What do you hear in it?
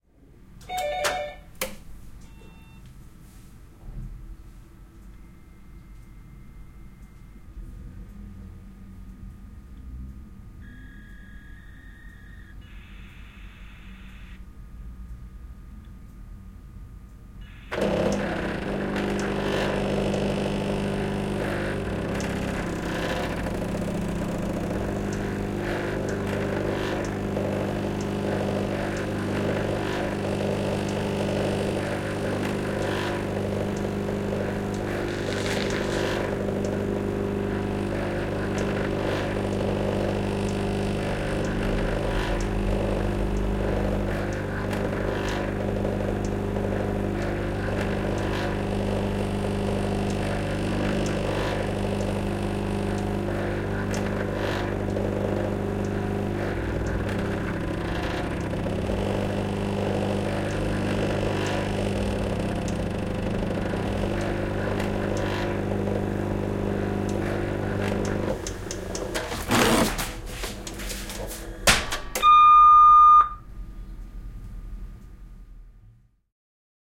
Faksi, vastaanotto / Fax, receiving a text message (Canon)
Faksilla vastaanotetaan viesti, tekstiviesti. (Canon).
Paikka/Place: Suomi / Finland / Nummela
Aika/Date: 21.10.1991
Field-recording, Communications, Facsimile, Telecommunications, Tehosteet, Finnish-Broadcasting-Company, Yleisradio, Suomi, Faksi, Tietoliikenne, Soundfx, Yle, Finland, Fax